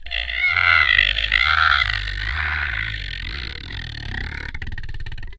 scree.flop.02
idiophone, daxophone, friction